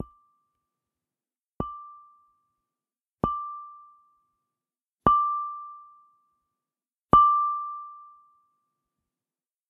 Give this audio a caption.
Wine Glass 2 - Struck
A brandy balloon type glass - tuned with water to one octave above middle C - struck five times by an old (hard felt) piano hammer, with increasing intensity. Higher pitch and shorter decay than "Wine Glass 1".
A home recording made with a Zoom H2N, set to Mid-Side stereo with 90 degrees separation. Normalisation and noise reduction applied in Audacity, with a 0.5 second fade out and 0.1 seconds of silence added to the end of each sound.
chiming, crystal, musique-concrete, ping, ring, sound-effect, ting, tuned